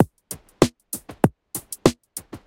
SimpleBeat97bpmVar2
This is a very basic beat which has some kind of nice groove. I left it rather dry so you can mangle it as you please.